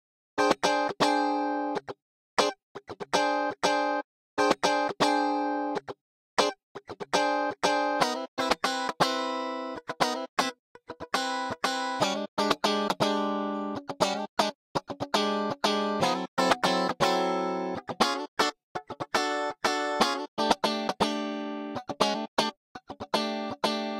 Song1 GUITAR Fa 4:4 120bpms
Chord, Guitar, rythm